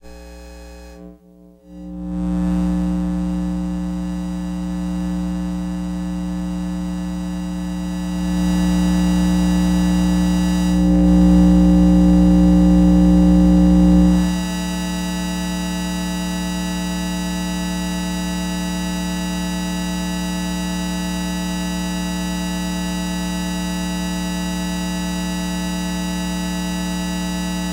Various sources of electromagnetic interference recorded with old magnetic telephone headset recorder and Olympus DS-40, converted and edited in Wavosaur. Cordless phone.